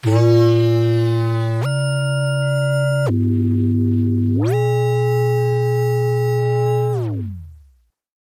DootDaDoot transformation
ASPMA, experimental, frequency-scaling, frequency-stretching, transformation